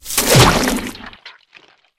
Deadly Trap with Spikes and Sharp Sticks for Video Games
The player falls into an even deadlier trap! OUCH!